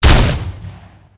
Coachgun Fire2
Coach gun fire sound
blackpowder, fire